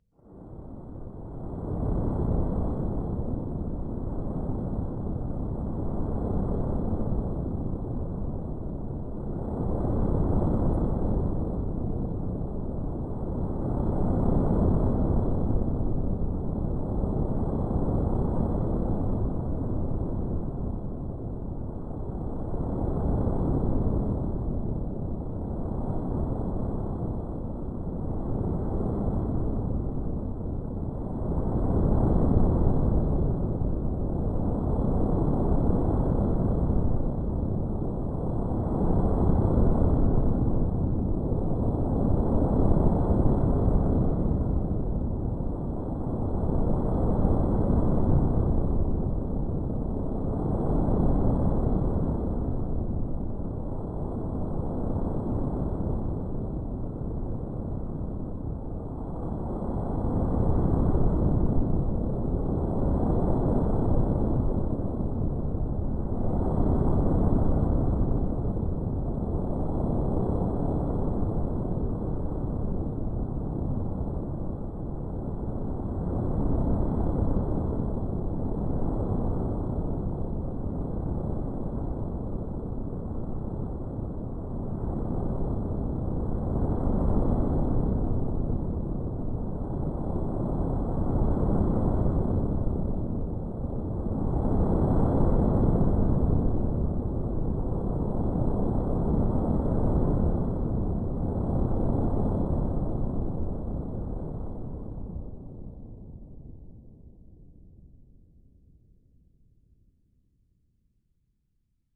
Lava Ambience
Dark ominous ambience sound suited for intense moments
Fantasy, Low-rumbles, Ominous, Ambience